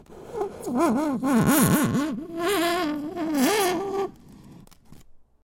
Rubbing and touching and manipulating some styrofoam in various ways. Recorded with an AT4021 mic into a modified Marantz PMD 661.
creak, funny, noise, rub, styrofoam